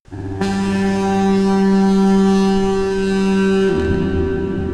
fog horn sample(wet)
This is a sample of an actual foghorn w/ added reverb to sound distant.
wet, horn, fog